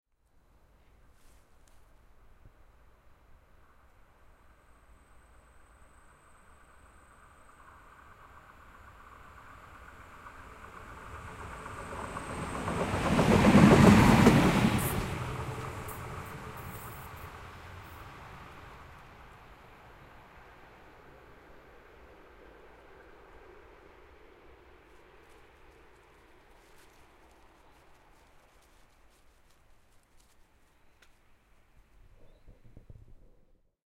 small-train-pass-by
small, train, field-recording